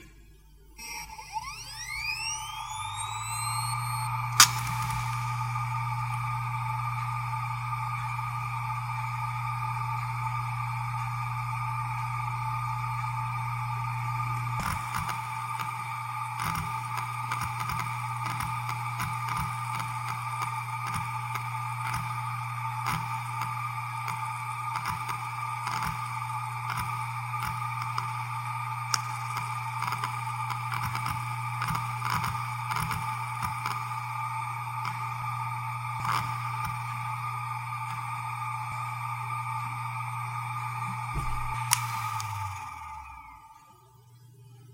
ExcelStor Ganymede - 7200rpm - FDB
An ExcelStor hard drive manufactured in 2004 close up; spin up, writing, spin down.This drive has 1 platter.
(ExcelStor J680)
disk, drive, excelstor, hard, hdd, machine, motor, rattle